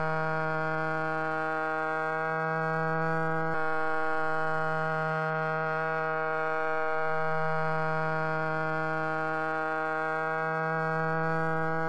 A time expanded and pitched goose, sounds a little like a stringed instrument or some type of horn - weird!